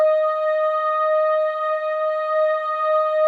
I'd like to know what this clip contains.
female d sharp reverb loop
Female singing a D sharp with reverb. No attack, loopable.
reverb, vocal, D, female, singing, loopable, loop, woman, voice